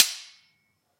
Sword Clash (43)
This sound was recorded with an iPod touch (5th gen)
The sound you hear is actually just a couple of large kitchen spatulas clashing together
clang, clanging, clank, clash, clashing, ding, hit, impact, iPod, knife, metal, metallic, metal-on-metal, ping, ring, ringing, slash, slashing, stainless, steel, strike, struck, sword, swords, ting